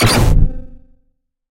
Heavy Blaster
Bang, Blaster, Fire, Gun, Gunshot, Heavy, Laser, Light, Loud, Machine, Pew, Pulse, Rifle, Rikochet, SciFi, Shoot, Shot, videgame